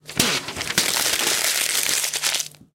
A paper being ripped from a noteblock and then crumbled.
effect, thrash, west, 2010, university, paper